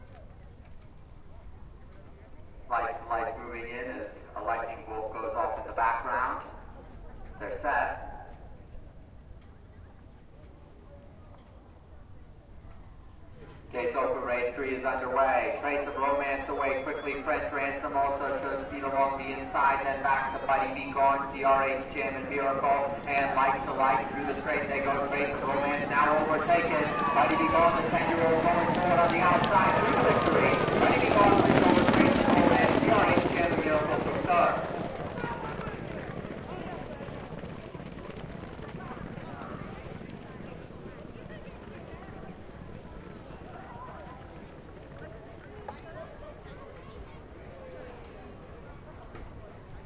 Quiet race before storm
This is the sound of horses walking by at Arapahoe Park in Colorado. It hasn't started raining yet so in this recording the track was listed as fast. The crowd sounds are relatively quiet.
crowd, horse, racing, announcer, horse-race, race, track, horse-racing, fast